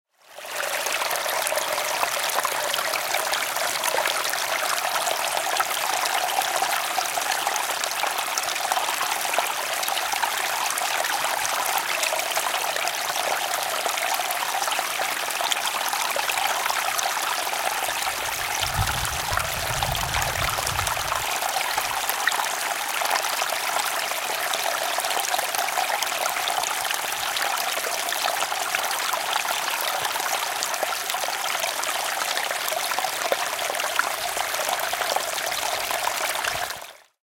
A recording of a stream found in Dolgellau, Wales.
Captured using a Shure MV88